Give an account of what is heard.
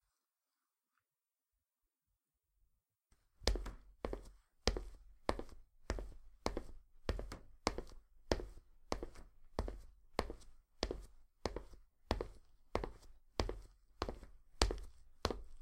Walking in trainers on hard surface.